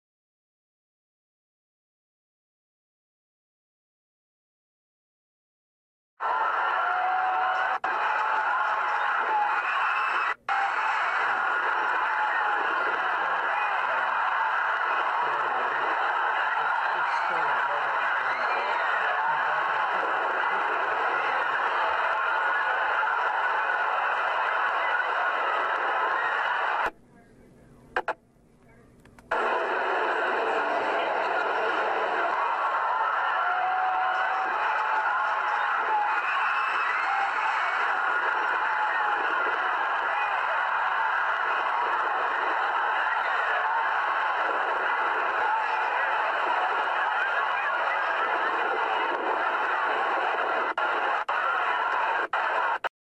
Crowd screaming
Sorry for the blank spots,it was recorded from a crashing building and the recorder skipped a little.
people, scream, collapse, structure, boom, radio, help, crash, cry, fair, crowd, police, building-crash, screaming